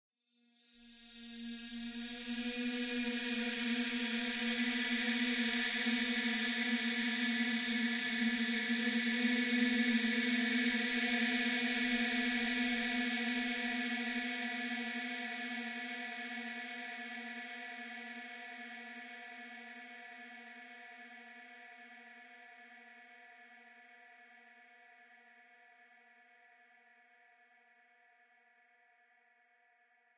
sad scream2 fx
scream, sounddesign, ghost